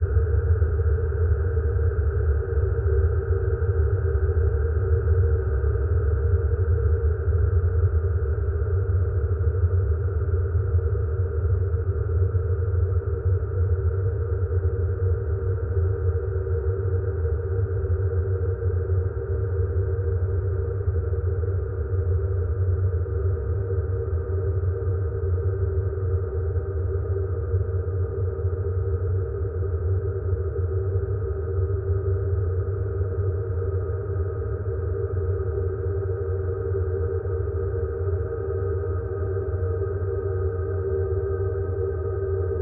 ps Noisepad
Noise made in Paulstretch from who knows what. At the very end, slight tones emerge.
deep, drone, hum, noise, Paulstretch, warm